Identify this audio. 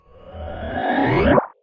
random thing
a random sci fi sounding sound
Effect, Fi, Game, Sci, Sound